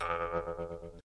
Jew's harp sigle hit
folk, tongue, lips, jews-harp, vargan, mouth-harp, folklore